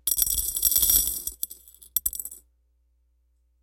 jingle bowl
Dropping some small metal pieces into a glass bowl. Recorded with a Cold Gold contact mic into a Zoom H4.
drop, noise, contact, glass, jingle, metal